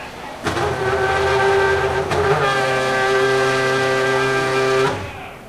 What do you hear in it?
F1 BR 06 Engine Starts 8
Formula1 Brazil 2006 race. engine starts "MD MZR50" "Mic ECM907"
field-recording, vroom, f1, mzr50, pulse-rate, car, ecm907, accelerating, engine, racing